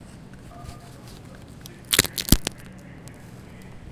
Cracking Tamarand
Cracking a tamarand shell.
abstract, syracuse, FND112-ASHLIFIORINI-ABSTRACTION